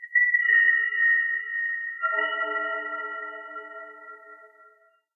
a resounding drone